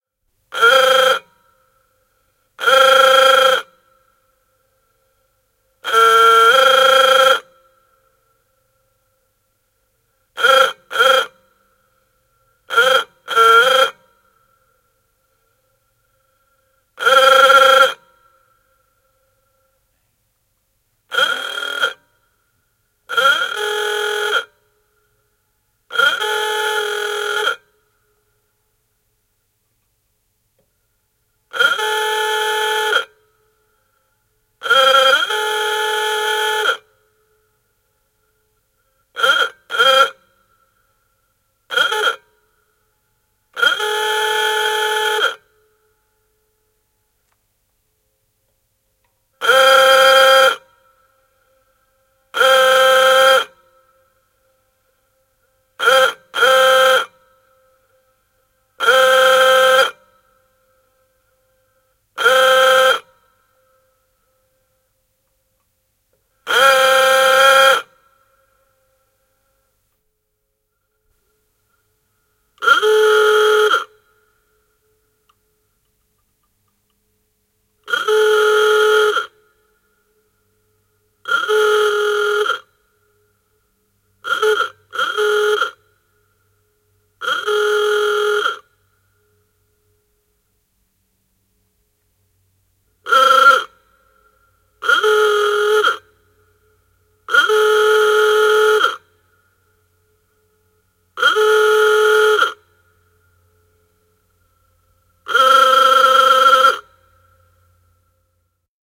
Ford Tudor Sedan A/2629, vm 1928. Auton torvi ulkona. Erilaisia. Vanha honk-honk-torvi. (34 hv, 3,28l/cm3).
Paikka/Place: Suomi / Finland / Pusula
Aika/Date: 22.10.1981